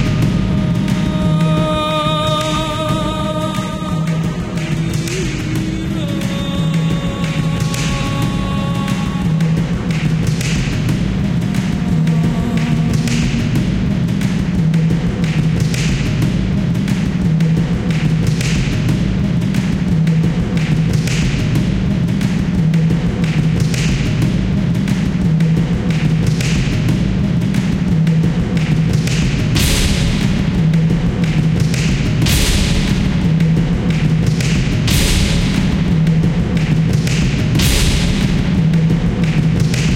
Hello friends!
Electronic motives for your best video trailers, film production or AAA class game projects!
Absolutely free, just download and use it ;)
Special thanks to all my followers!
Best wishes and good luck!